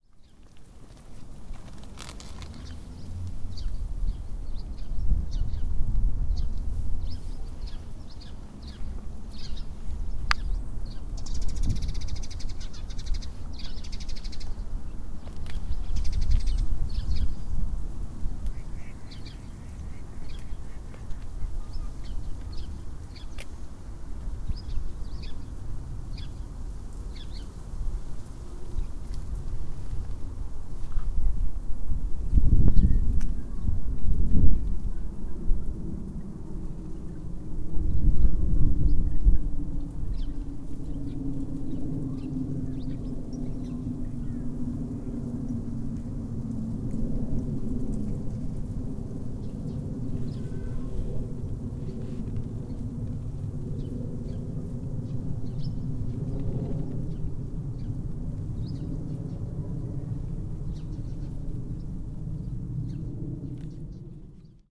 Ambient sound of nature in alley near the village.